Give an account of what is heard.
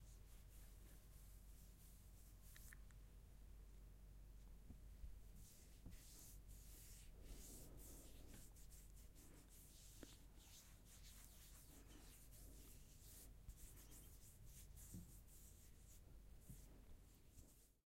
Wiping powder onto face
Rubbing facial powder and/or setting powder into face.
brushing, flesh, swiping, wiping, face, nose, touch, wipe, rubbing, hit, brush, hands, gentle, powder, fingers, rub, powdery, dry, OWI, beauty, finger, hand, makeup, soft, swipe, skin